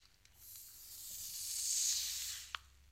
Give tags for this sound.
pack; coffee; open; kitchen

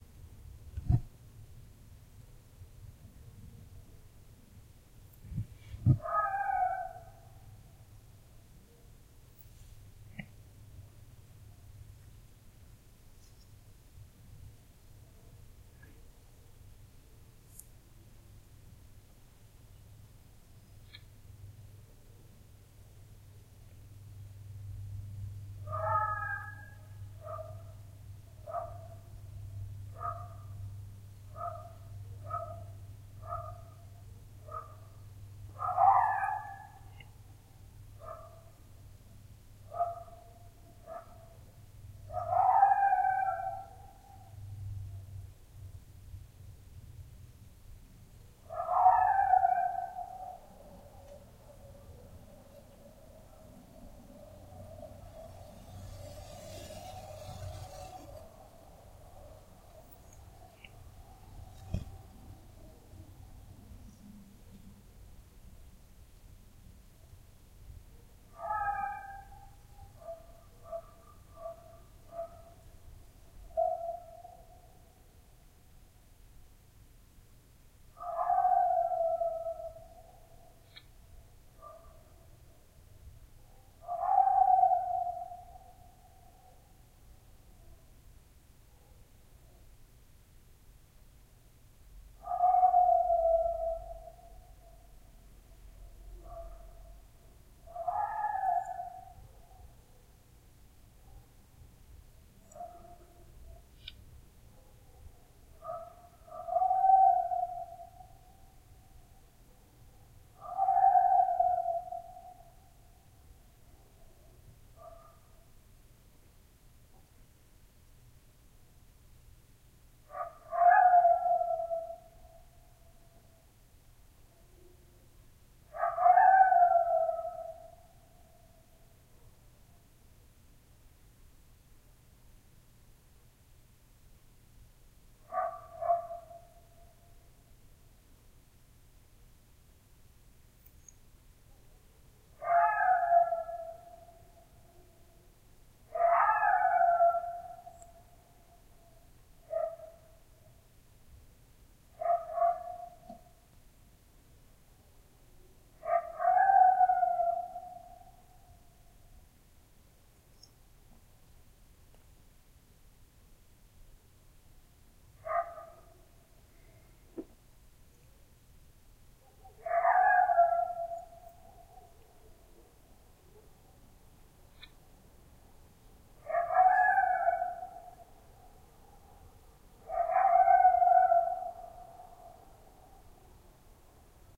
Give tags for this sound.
coyote; field-recording; nature; wildlife

